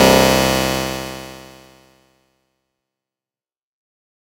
Blip Trails: C2 note, random blip sounds with fast attacks and short trails using Massive. Sampled into Ableton with just a touch of reverb to help the trail smooth out, compression using PSP Compressor2 and PSP Warmer. Random parameters in Massive, and very little other effects used. Crazy sounds is what I do.